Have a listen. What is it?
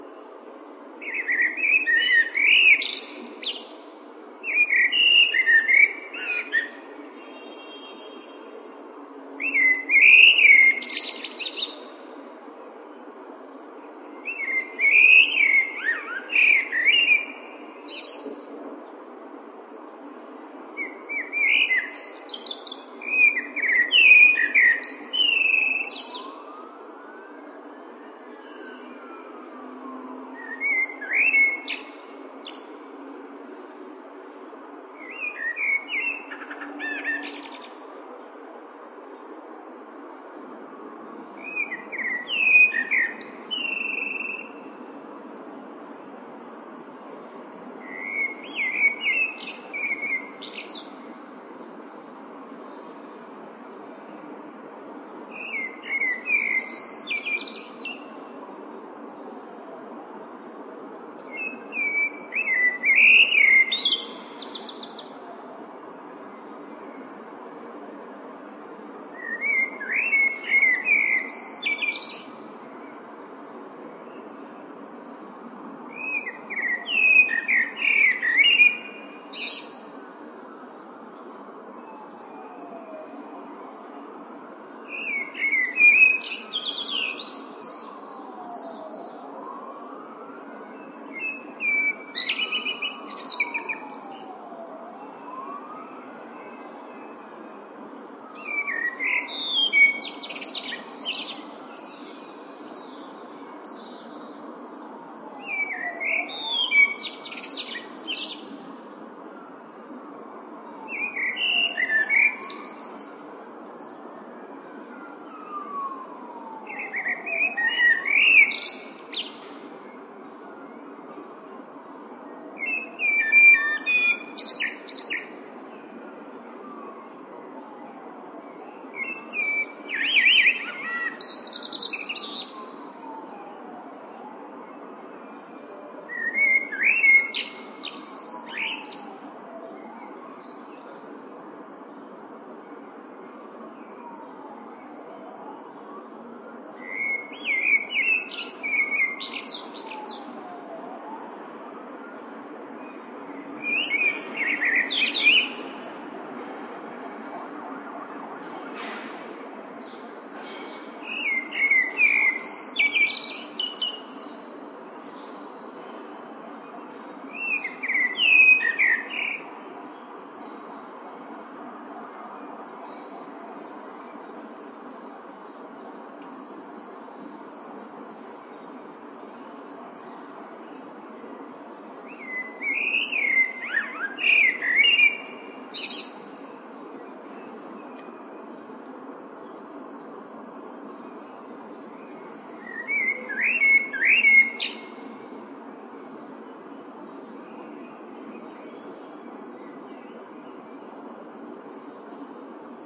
20060329.blackbird.dawn.city
an inspired male blackbird singing at dawn perched on my roof. Traffic rumble in background. Yes, blackbirds can produce varied chirps ('Blacbird singing in the death of night...' / un mirlo particularmente inspirado cantando al amanecer posado en mi tejado. Ruido de trafico al fondo. Por lo visto los mirlos tienen muchos más registros de lo que yo creía.
south-spain, blackbird, traffic-noise, nature, birds, city, field-recording